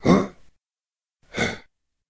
monster surprised
A monster notices something, but then decides it is nothing.
monster, grunt, surprised, gruff